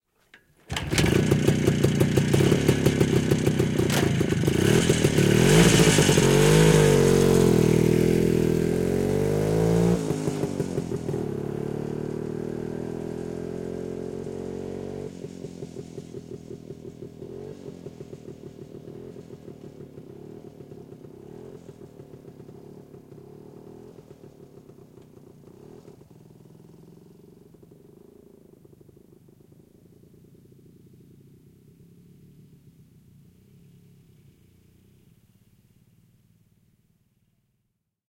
Moottoripyörä, vanha, lähtö soralla / An old motorbike, start, pulling away on gravel, Jawa, 250 cm3, a 1956 model
Jawa, 250 cm3, vm 1956. Käynnistys polkimella, lähtö soratiellä, etääntyy.
Paikka/Place: Suomi / Finland / Kitee / Kesälahti
Aika/Date: 20.08.1988